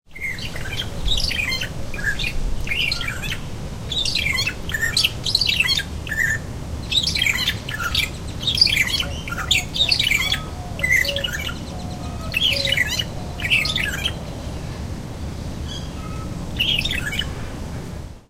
Song of a Magpie Shrike. Recorded with a Zoom H2.
aviary, bird, birds, exotic, field-recording, shrike, tropical, zoo